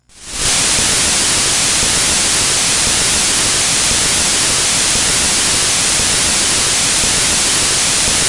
Soundeffects recorded from the Atari ST